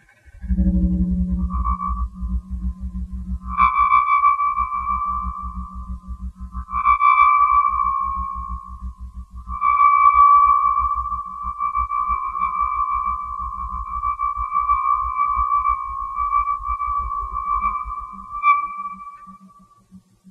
bass clarinet vibrato
bass clarinet processed samples
bass-clarinet, transformation, vibrato